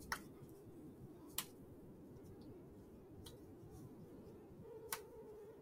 quiet pops
sound effects body hands clap snap pop click hit
snap, sound, pop, hands, clap, body, click, effects, hit